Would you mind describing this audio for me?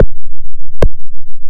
Retro, Footsteps
If you enjoyed the sound, please STAR, COMMENT, SPREAD THE WORD!🗣 It really helps!
More content Otw!